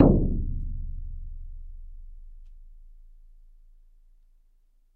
Shaman Hand Frame Drum 10 02
Shaman Hand Frame Drum
Studio Recording
Rode NT1000
AKG C1000s
Clock Audio C 009E-RF Boundary Microphone
Reaper DAW
hand, shamanic, percussion, drums, percs, bodhran